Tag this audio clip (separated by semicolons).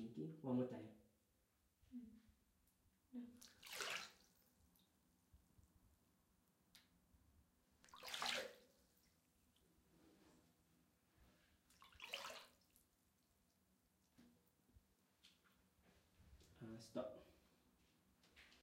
nature; surrounding; sea; soft